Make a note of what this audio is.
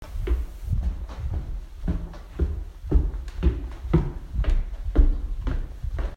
sound of a person walking
Feet, Foot, Footsteps, Walk